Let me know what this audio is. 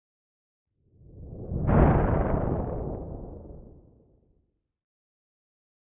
Synthesized Thunder Slow 08
Synthesized using a Korg microKorg
lightning, synthesis, thunder, weather